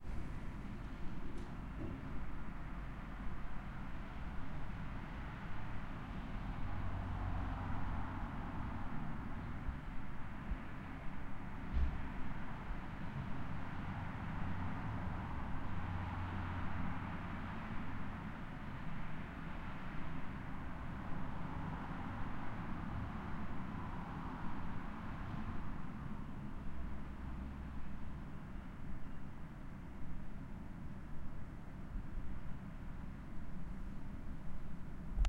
conservatory ambiance recording